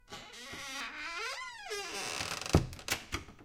Closing of heavy wooden door with squeaky hinges. Recorded in studio (clean recording)